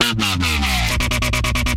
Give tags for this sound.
melody
game
drums
loops
hit
8-bit
sounds
drum
loop
video
synthesizer
samples
music
digital
chords
sample
awesome
synth

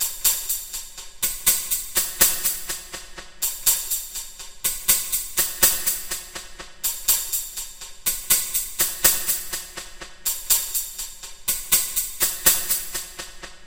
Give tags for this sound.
ghost
horror
horror-effects
horror-fx
maze
strange
stranger
terrifying
terror
thrill

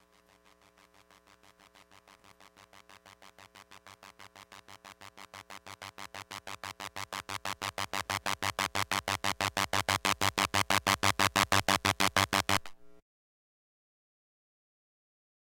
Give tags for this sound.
drone
coil
noise
electrical
electromagnetic
unprocessed
appliance
pick-up

